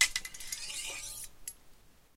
Swords Clash and Slide 2
Two swords collide and slide off of each other.